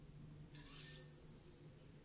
18-Placa consultorio
Foley practice brightness
practice, Foley, brightness